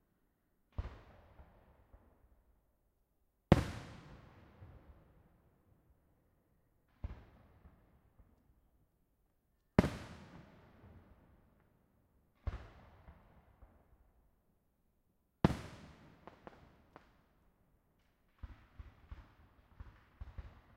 Fireworks in a small valley, over a lake. Small, pops with distant echo
Echo, Pop, Firecracker, Boom, Fireworks